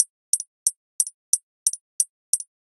hi hat loop